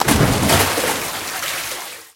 Big Water Splash
drop, water, splash, large, sea, big, splashing